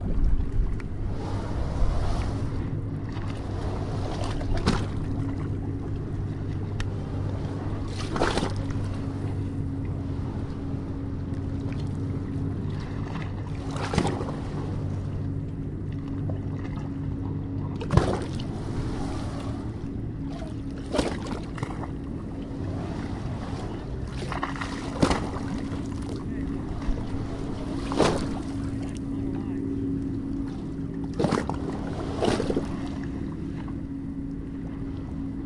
Recording made with a Zoom H1 on a pier in the Hudson River Park, where the river is splashing against the pier. Low drone of a motorized boat in the background, and some pedestrian chatter.
water, field-recording, river